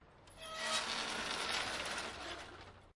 Rolling shutters up and opening
balcony, rolling, shutters, up